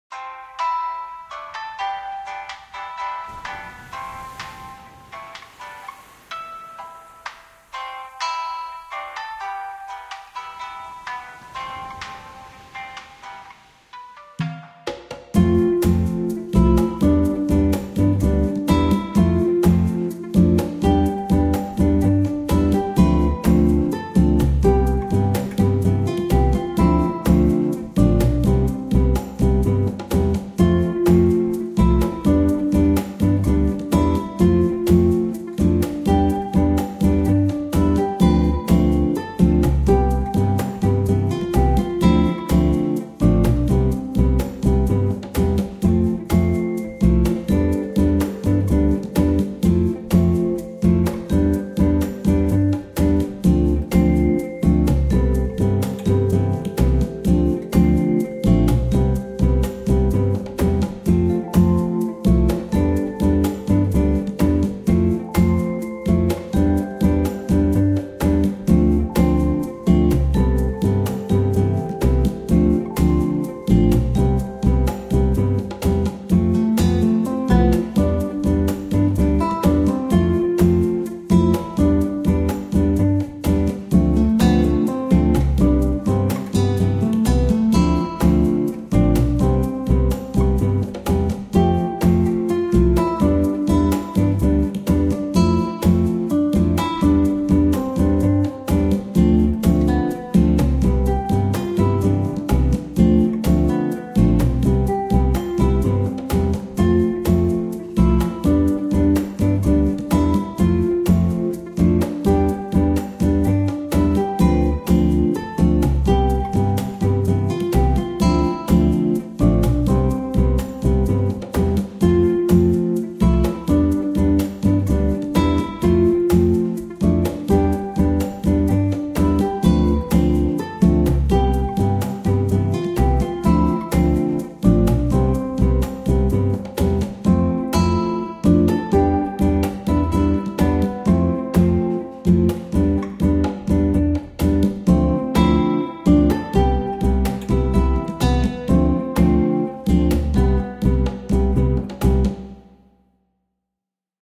Relaxing Music
Genre: Jazz?
Track: 62/100